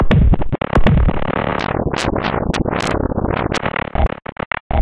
13. Quirkly spittle noise.
noise, processed, electronic